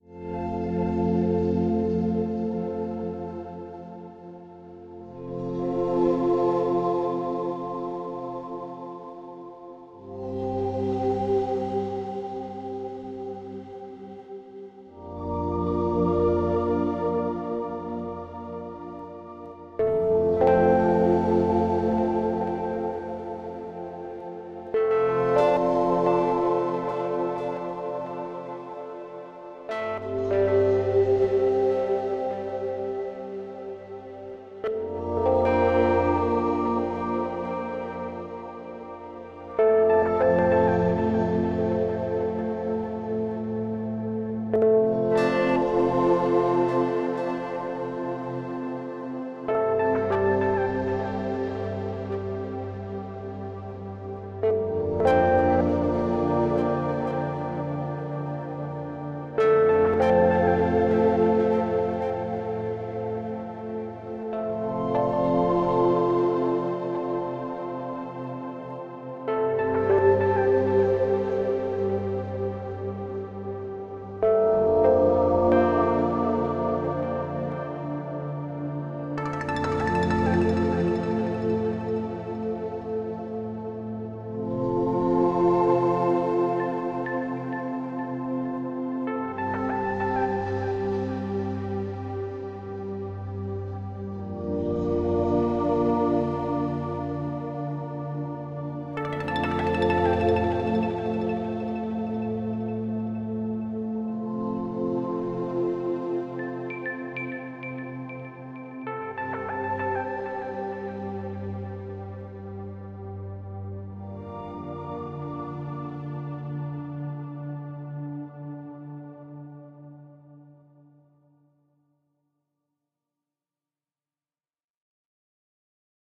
This is is a track with nice sound. It can be perfectly used in cinematic projects. Warm and sad pad.